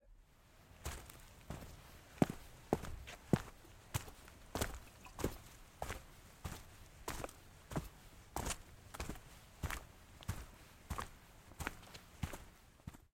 Sound of heavy footsteps on hard ground.
Recorded at Springbrook National Park, Queensland using the Zoom H6 Mid-side module.